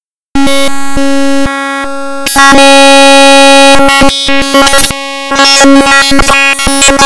Raw data created in Audacity from an uninstaller!